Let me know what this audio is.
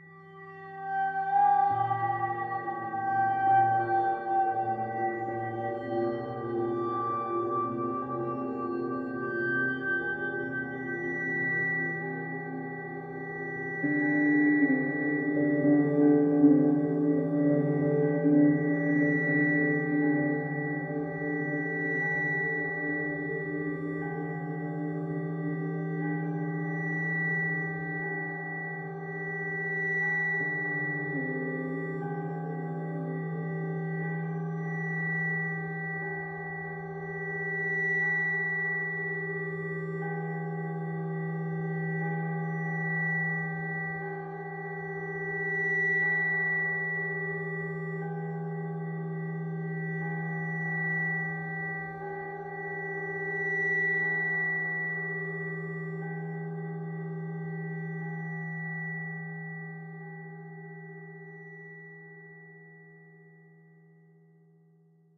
A strange discordant plaintive sound - original source material was a couple of guitar samples I recorded. These have been heavily processed and modulated. A bell-like sound creeps in. Part of my Atmospheres and Soundscapes pack which consists of sounds, often cinematic in feel, designed for use in music projects or as backgrounds intros and soundscapes for film and games.